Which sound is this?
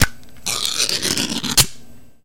Sound of metal Spam can being opened. Similar to the sound of a can of sardines being opened.